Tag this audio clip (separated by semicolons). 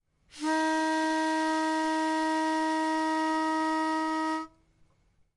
pipe; E-flat; D-sharp